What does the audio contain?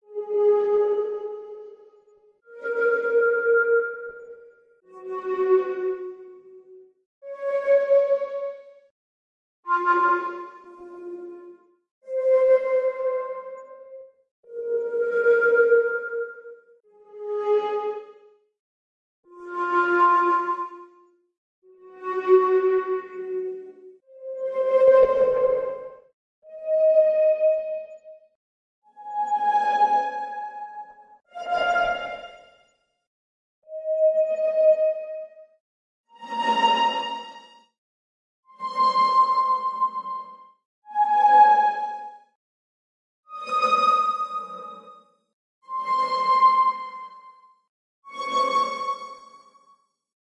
Sample Scale
A seven note scale (C C# D# F F# G# A#) over separate octaves. Some notes repeat in the same octave, but are slightly different samples. Each note starts on the grid of 100bpm.
Recorded the samples a few years ago. From memory the original sounds are sections of acoustic guitar notes, manipulated, pitched and fx added.
notes, 100, samples, 100bpm, unique, note, loop, pitch, range, bpm, sample, octave, experimental, scale